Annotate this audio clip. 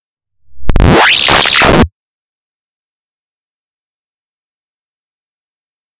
sound synthesis made from ChucK programming language.
the model is a connection from an impulse generator to 40 digital filters to 40 delay lines
and set feedback between each delay lines.
each delay lines's delay time and cutoff frequency is random.
but there was an error in the calculation and the output value overflows.
and this create a chaotic behavior in this sample.
this one rises quickly, goes into chaos for a bit,
then falls down into silence